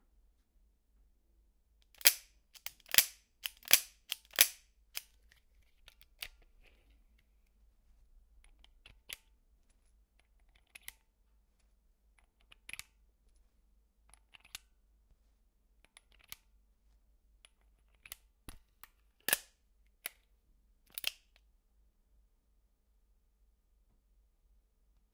Revolver Reload
Dryfire and reload of my Ruger GP100 .357 Magnum revolver. Recorded indoors
Always fun to hear where my recordings end up :)